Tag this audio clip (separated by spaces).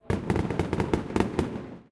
firecrackers bomb firework fire fire-works rockets